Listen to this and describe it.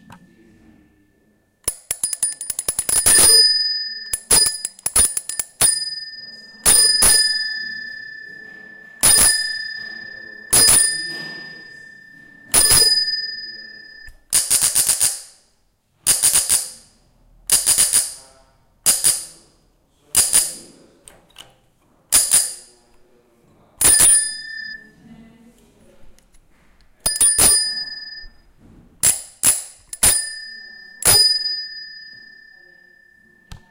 Human mirella bell
Human Bike Sound Archive